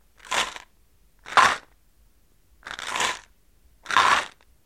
Pills shaking and striking against the bottle's interior surface.
pills, shaken, shaking, shook, rattle, motion, rattling, shake